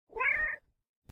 Animal Cat Meow5
cat, kitten, kitty, meow, rowr